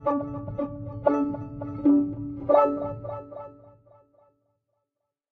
A violin strumed to great effect.